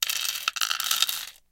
Rhythmic fragment from recording mancala pieces.
mancala, game